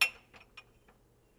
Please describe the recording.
short hits, ceramic clinking together